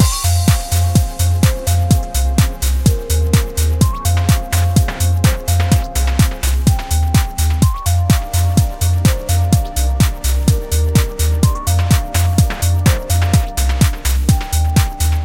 A light loop with some stereo effects